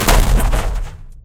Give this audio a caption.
A rough analog to an explosion, a work in progress, could be coupled with sounds of trash and debris to make the sound fuller. May fit as an artillery sound effect.
bomb, experimental, explosion, soundeffect